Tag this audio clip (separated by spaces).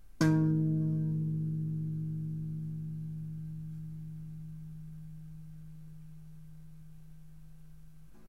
Scary Sreamer